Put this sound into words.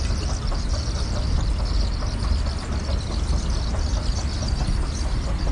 an escalator in Osmanbey station
weird, escalator